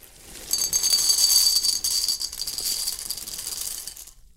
25-basura-cae-caneca
This is a foley of trash falling to the ground it was done with detergent on a bowl, this foley is for a college project.